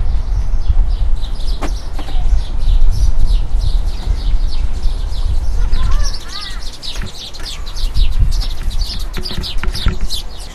Gorrions-Alejandro y Daniel
En aquesta grabació es poden escoltar a varis pardals. Estabem en la part superior d´una atracció de parc. Al costat d´un arbre.
city, pardals, park